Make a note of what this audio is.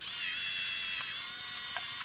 MinoltaV300Zoom4
Zooming the lens/focusing on a Minolta Vectis-300 APS film camera. There are several different sounds in this series, some clicks, some zoom noises.